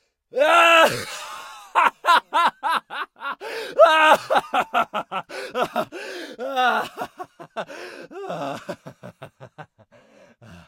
smích muž zvláštní3
laughter, male, man, fun